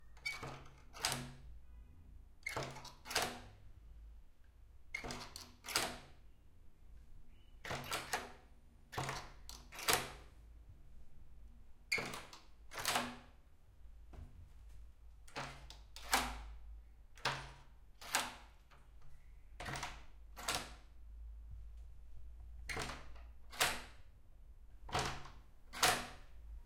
door wood int old squeaky handle turn metal creak various
creak, door, handle, int, metal, old, squeaky, turn, wood